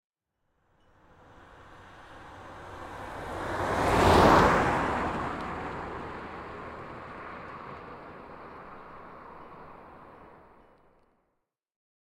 Car drives by
auto, automobile, car, drive, driving, engine, ford, motor, race, start, vehicle